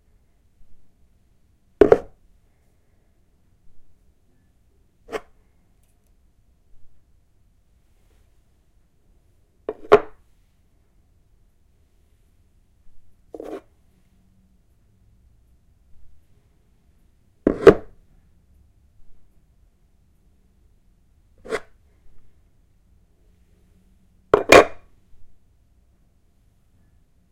jar and ceramic set down
Foley recording, setting down and picking up a glass jar and a ceramic tile.
set, glass, jar, foley, down, ceramic, counter